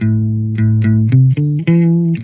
guitar recording for training melodic loop in sample base music